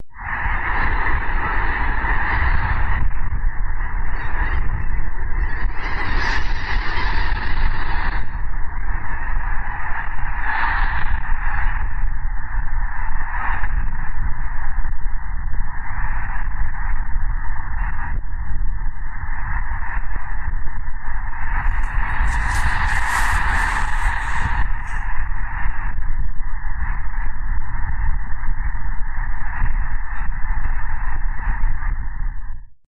The sound of 200,000 Snow geese at Squaw Creek Wildlife Refuge in northwestern Missouri.
Snow, geese